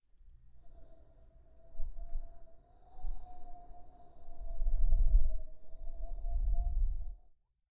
Viento helado
blowing, freezing